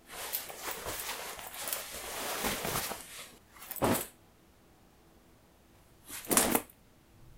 taking off a coat and tossing it on the ground
A sequence involving taking a coat and then lazily tossing it on the floor. Recorded with a ZOOM H2N.